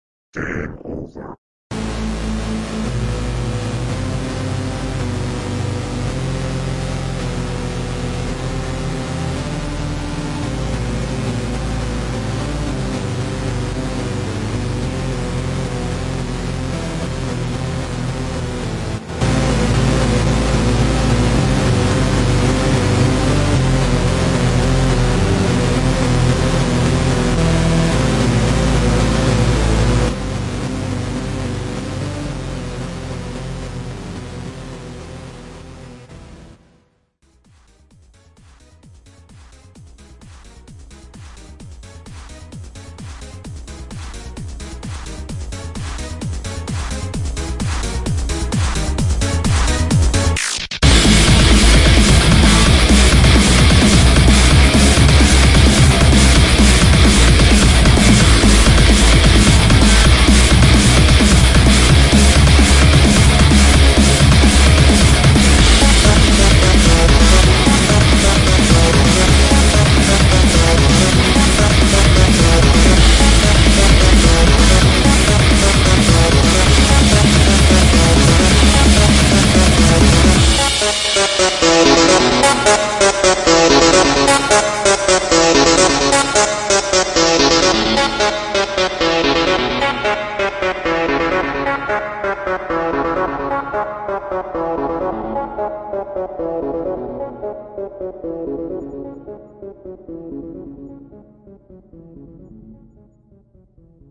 Game over (unfinished)
Beat, boss, drop, Evil, fight, Game-over, Undertale